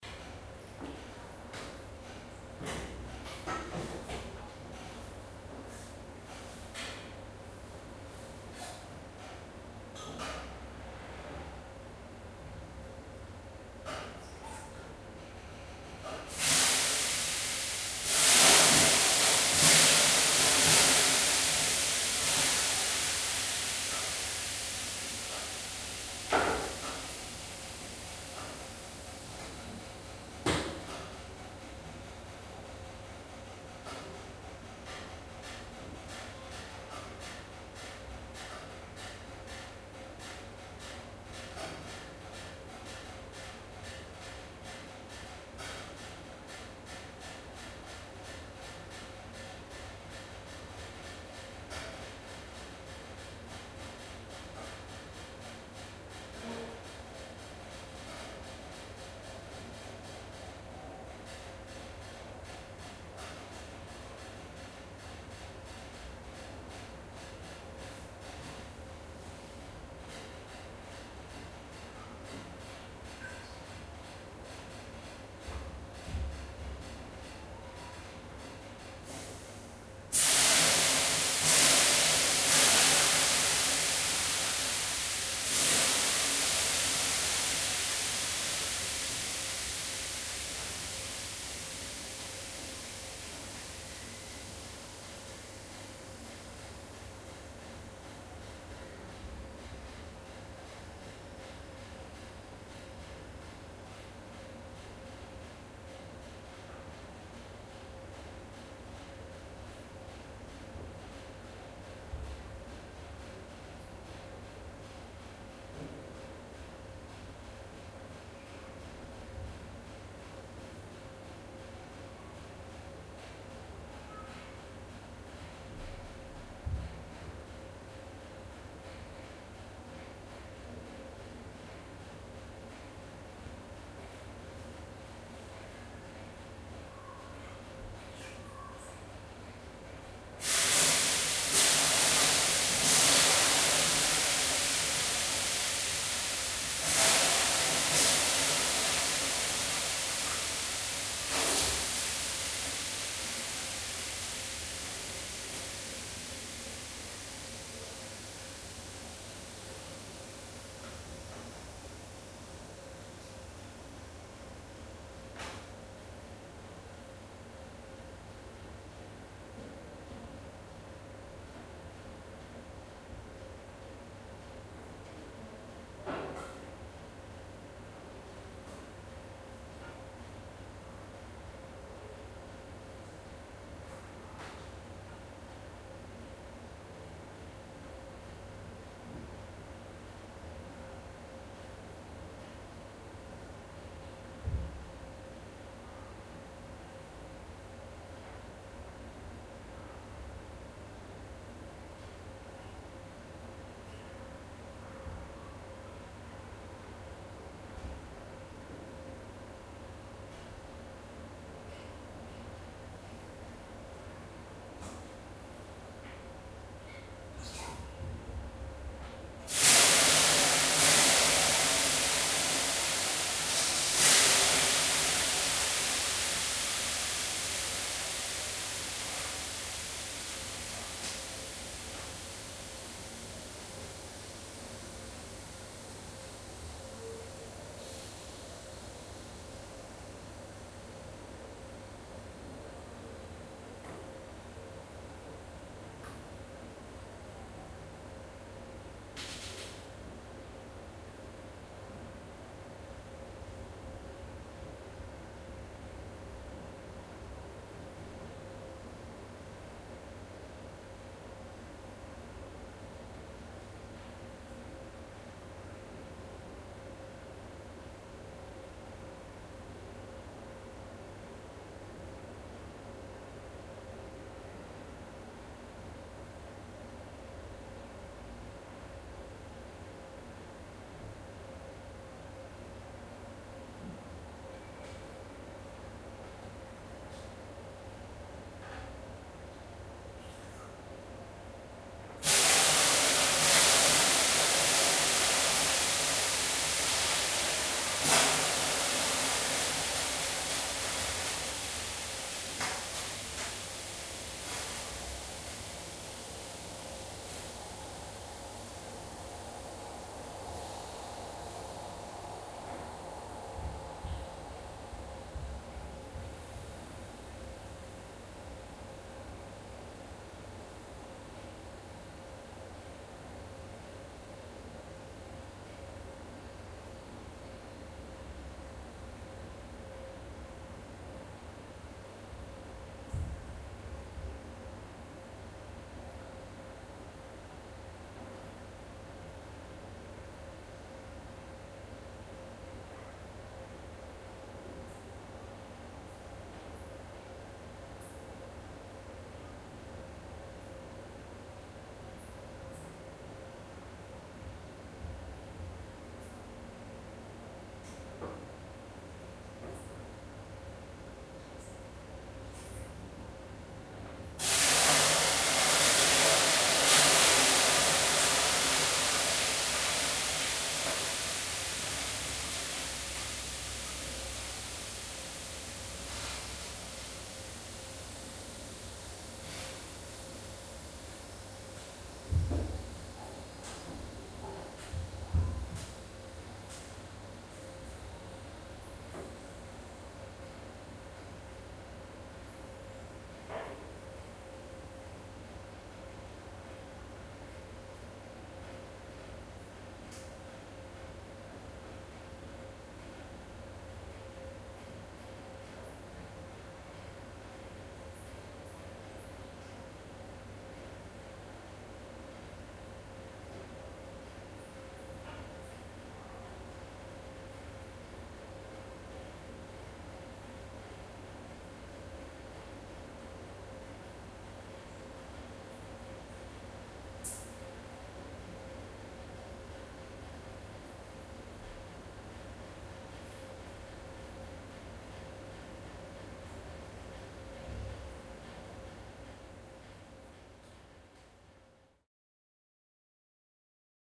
An electric sauna session from Finland.
Sauna, Stove, H2n, Steam